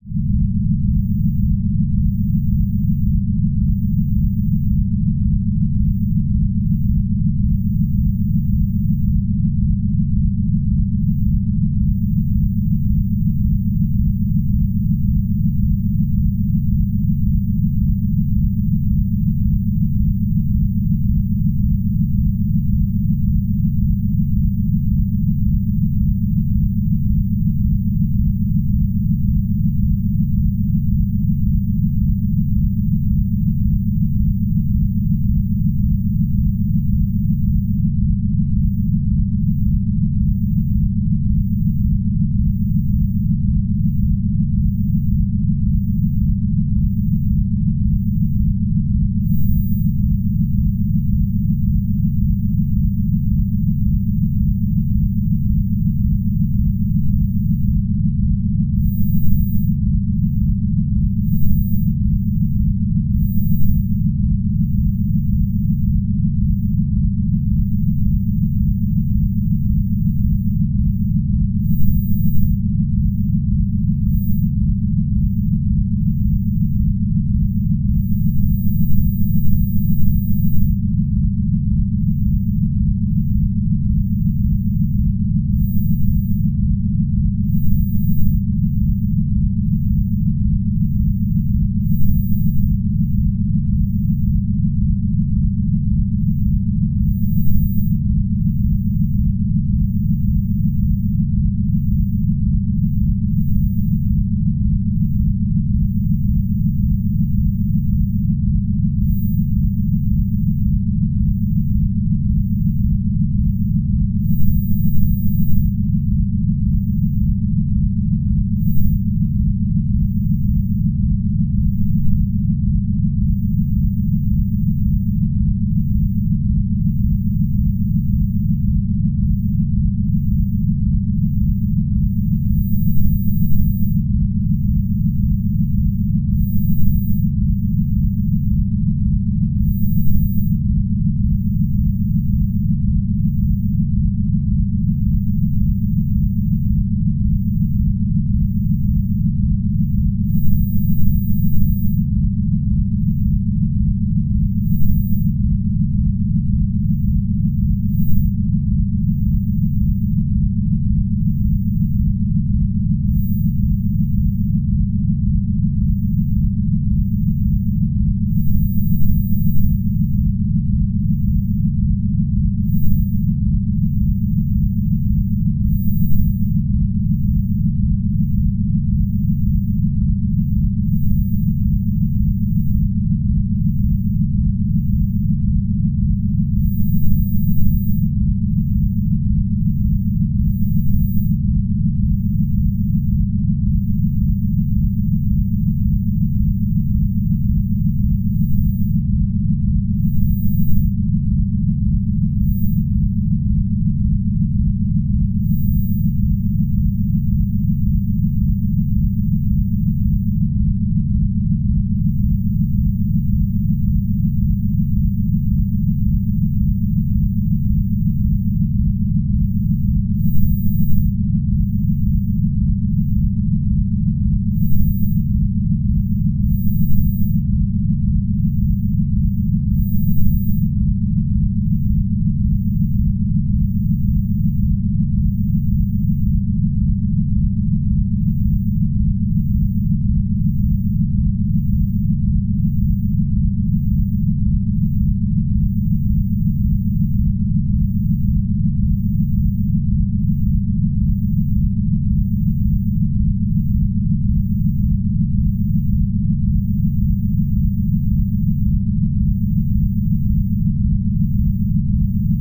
space ship atmos
Space ship atmnos
atmosphere, atmos, ship, space, ambience, hum